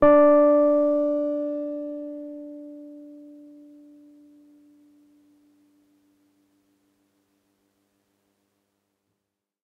My Wurlitzer 200a Sampled thru a Lundal Transformer and a real Tube Preamp. The Piano is in good condition and not bad tuned (You still can retune 3 or 4 Samples a little bit).I Sampled the Piano so that use it live on my Korg Microsampler (so I also made a "msmpl_bank")
200a, electric, e-piano